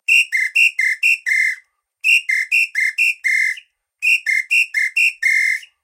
Different rhythmic patterns made by a samba whistle. Vivanco EM35, Marantz PMD 671, low frequences filtered.
brazil, pattern, percussion, rhythm, samba, whistle